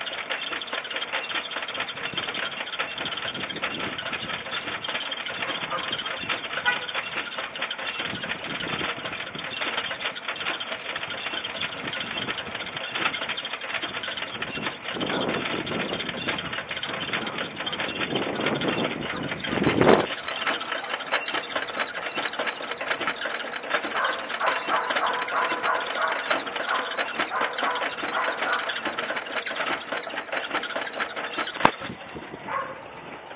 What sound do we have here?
The sound of an old gas pump. Kind of rickety. Could also be used for a cartoon jalopy or something.